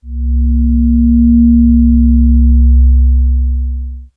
slobber bob A#
Multisamples created with Adsynth additive synthesis. Lots of harmonics. File name indicates frequency. A#
additive, bass, metallic, multisample, swell, synthesis